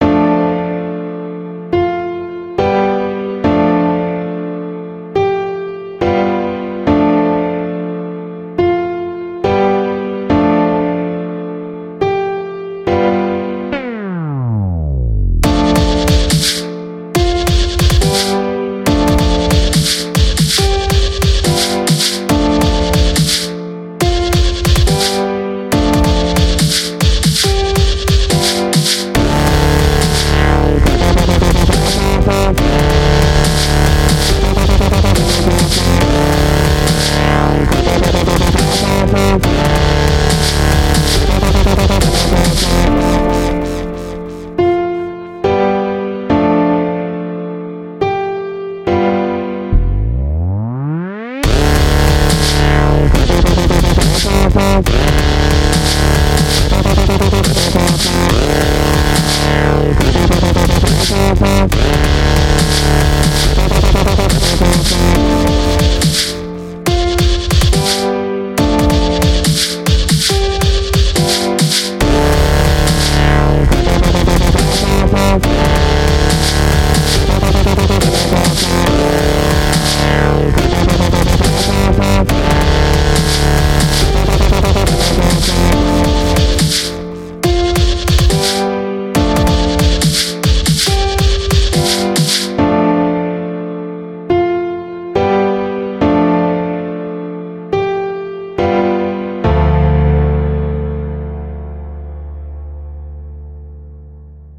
Piano Dubstep
Short Dubstep-Piano Sample
Done in Fruity Loops with Nexus and Sytrus
Dubstep-Piano, Sample, Short